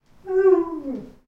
Boris Short Moan 2
Our Alaskan Malamute puppy, Boris, recorded inside with a Zoom H2. He is apt to moan in the morning when my wife leaves.
growl, bark, dog, malamute, howl, husky, moan, Wolf